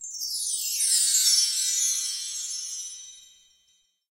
chimes 3sec gliss down
Descending glissando on LP double-row chime tree. Recorded in my closet on Yamaha AW16-G using a cheap Shure mic.